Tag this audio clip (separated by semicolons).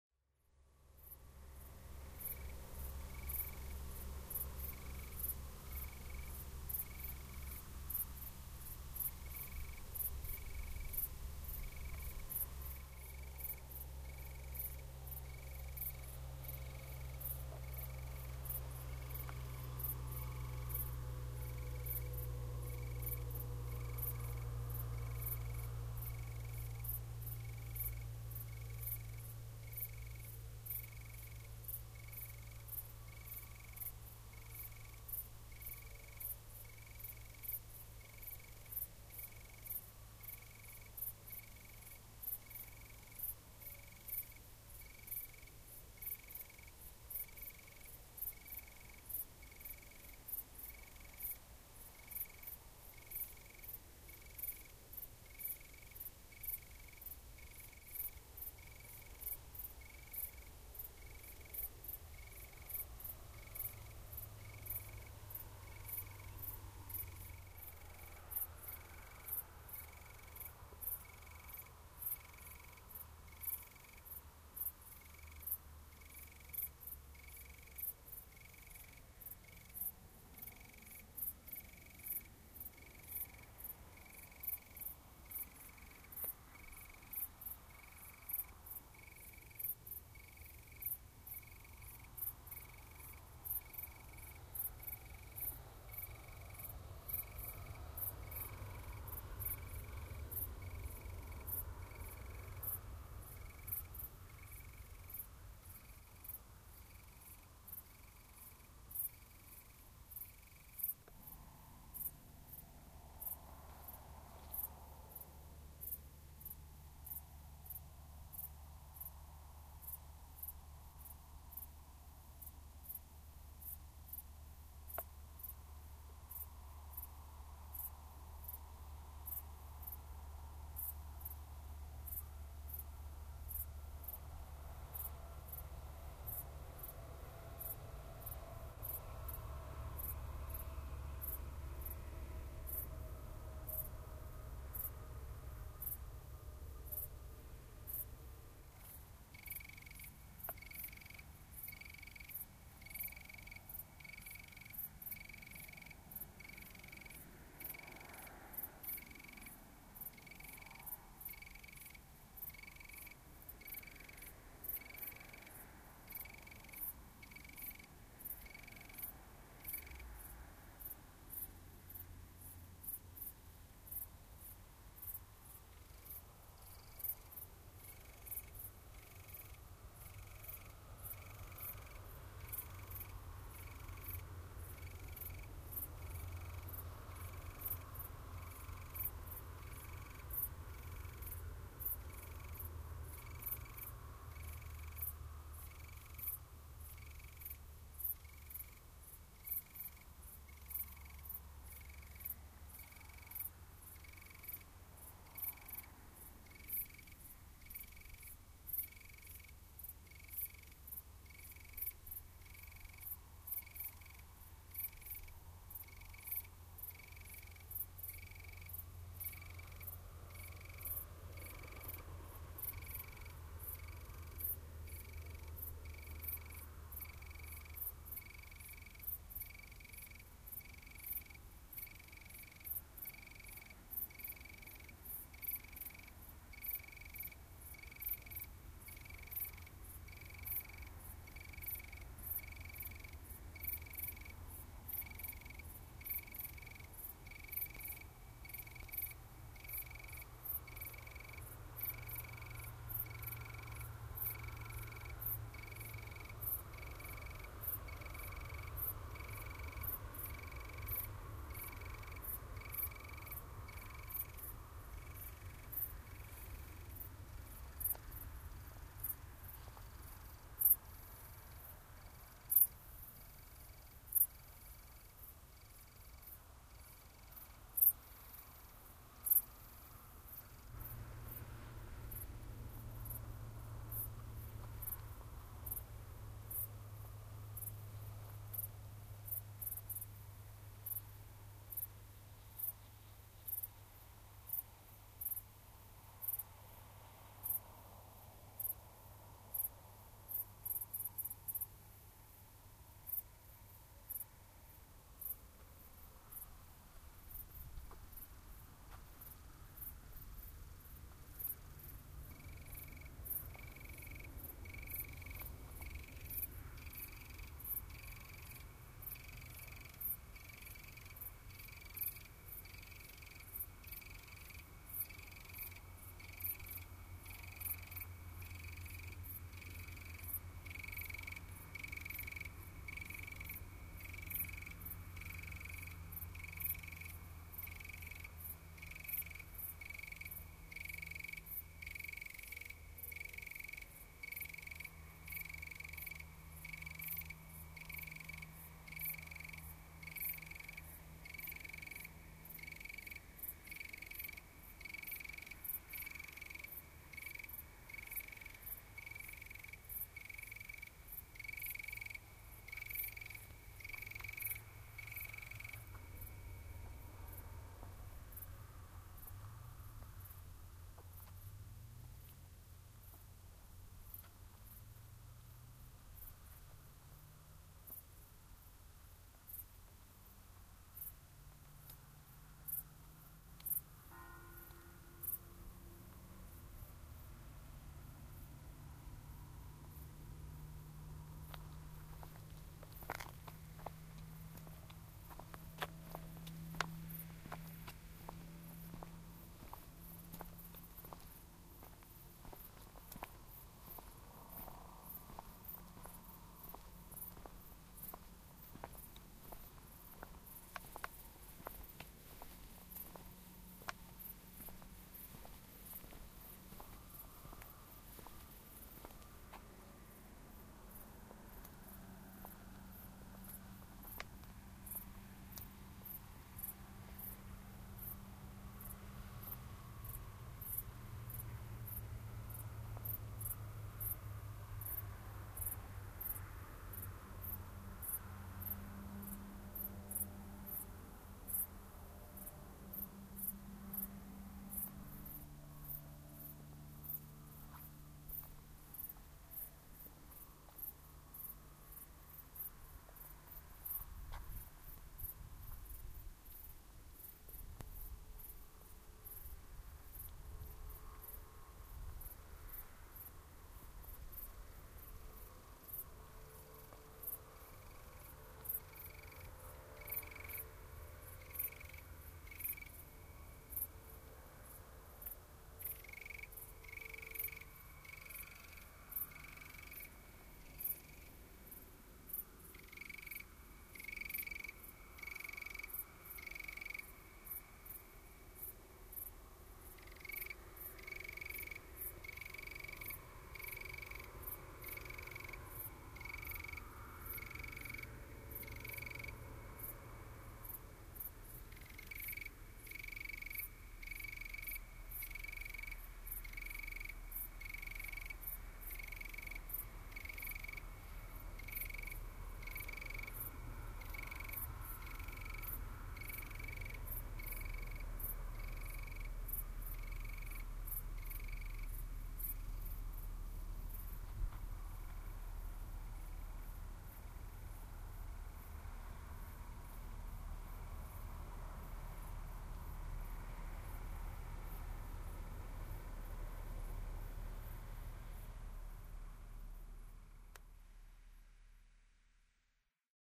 cicadas; field-recording; insects; nature